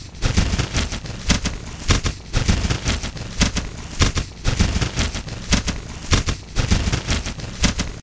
wax paper cut looped played backwards & layered with original
I took a clip from the audio I recorded of wax paper crinkling, cut a segment from it, played it backwards, put it on loop, layered it with the original, and this is what I got. There is an somewhat syncopated pattern to the sounds, but the audio is cut off before it makes a full cycle. Enjoy.
experimental, logic